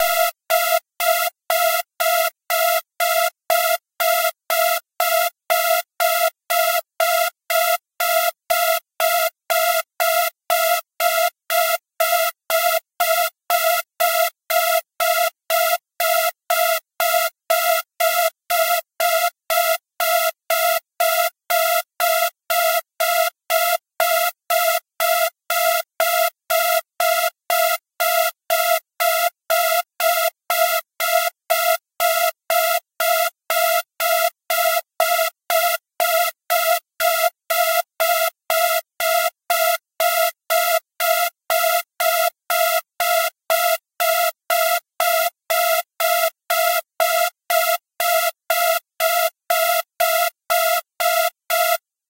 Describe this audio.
A fast-repeating alarm sound. I needed a sound that wasn't recognizable, so I created a new one by playing high pitched dissonant notes.
Created in GarageBand using an adjusted version of one of their software instruments.